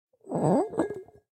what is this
Big Wine Bottle on Ceramic Floor, recorded with Rode iXY.